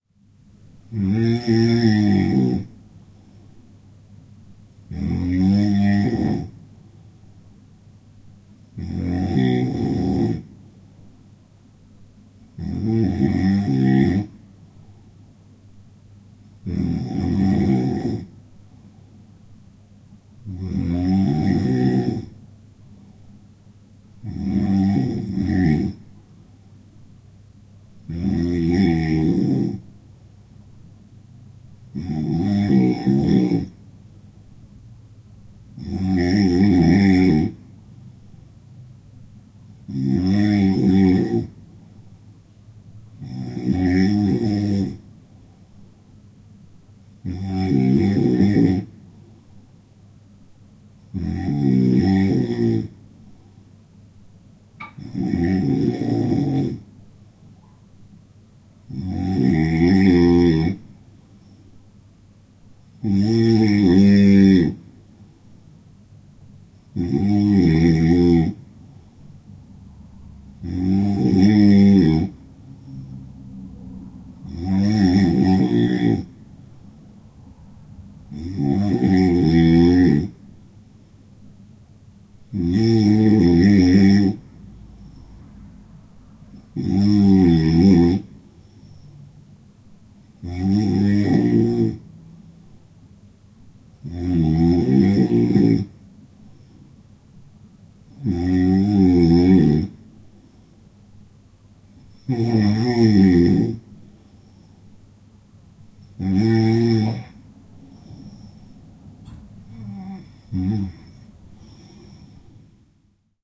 Spanish snorer. Recorded at with a zoom H4n.